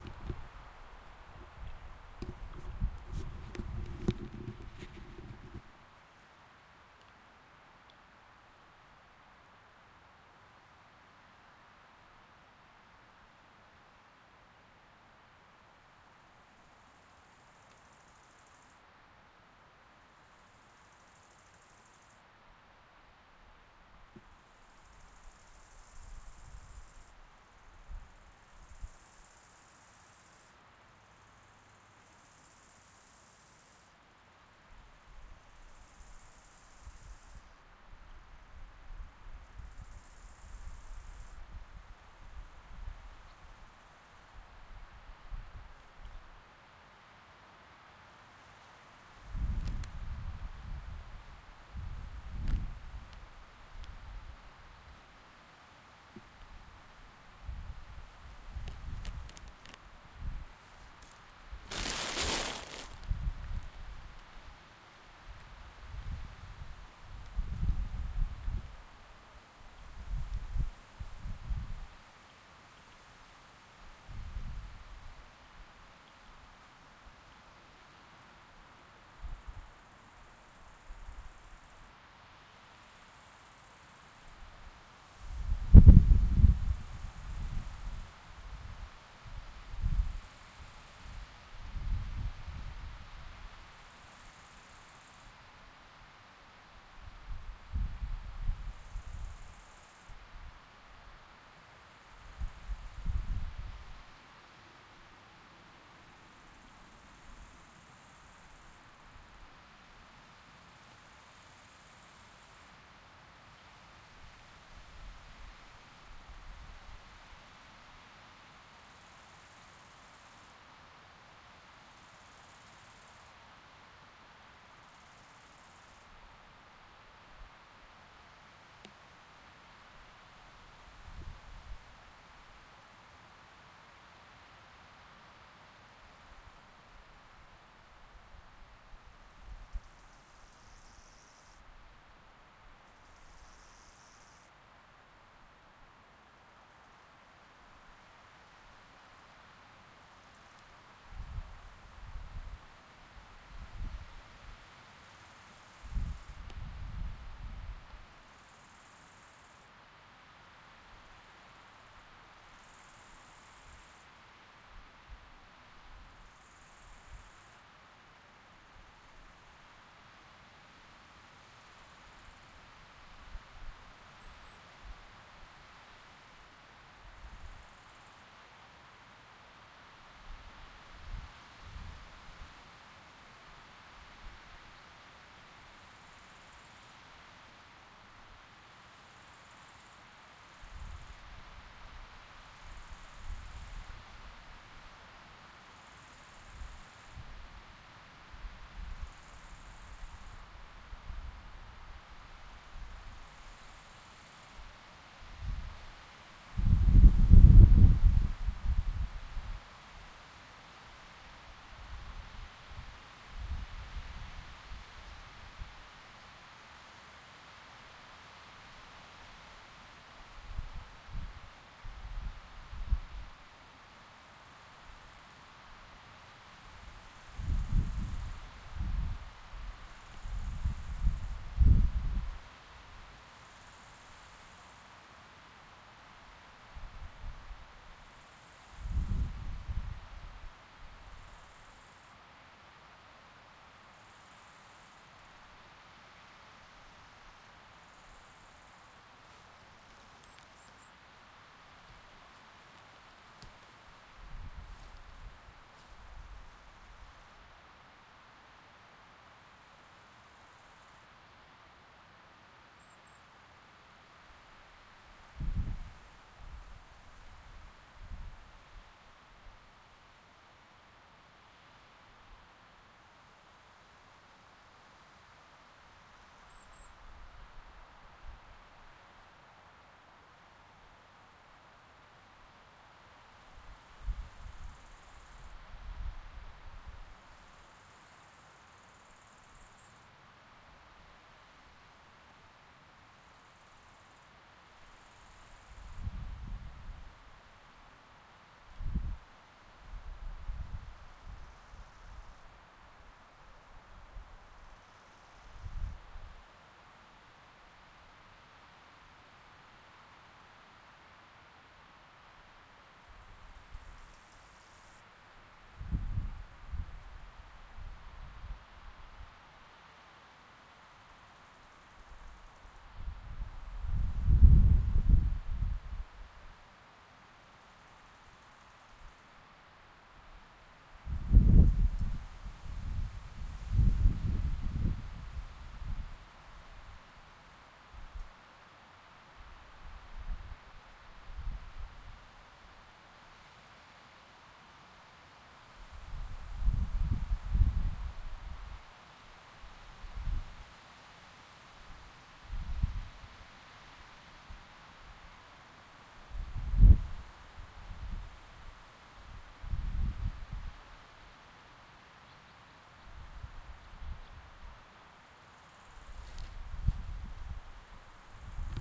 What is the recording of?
wind in the autumn forest - front
autumn-forest, forest, nature, sound, strong-wind, trees, wind, woods